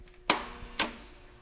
machine clicks or breaker box switches button
box, breaker, button, clicks, machine, or, switches